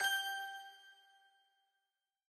magic game win success 2
a magic / game win sound.
cartoon, game, magic, notifier, success, win